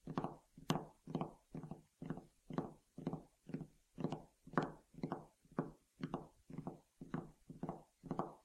Fingers tapping on a bench.